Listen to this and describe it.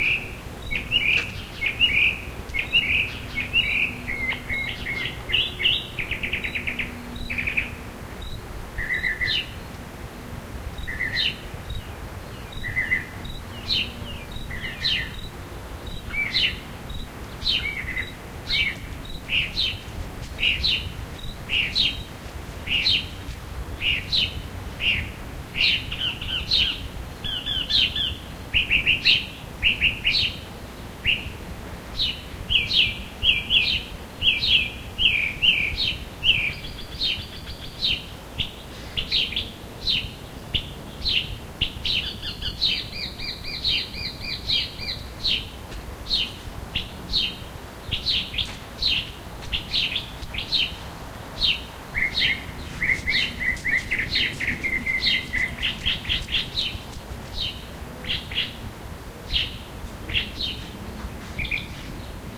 Mocking bird with sparrow in background, recorded in Albuquerque, May 2002